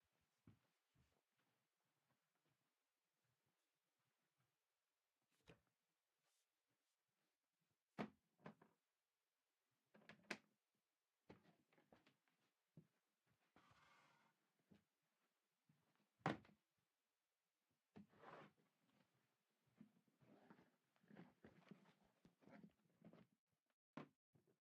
Moving Around Noise
someone moving around
human, human-moving, moving, noise